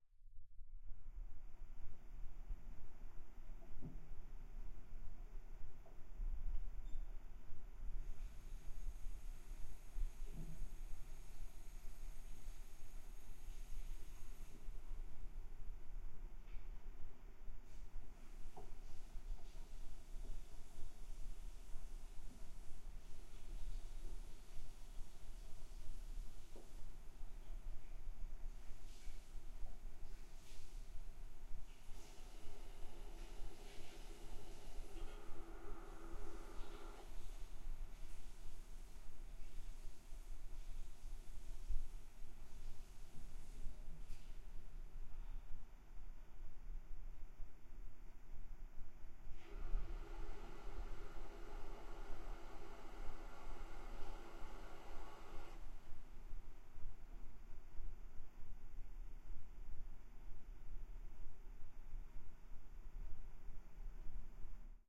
Cave Machine small hum atmos 2
I recorded a quiet room of a house with lots of people in it. Unfortunately the mic preamp was very noisy so I applied noise reduction filters to achieve the creepy end result.
atmos, cave, machine, machine-room, steam-punk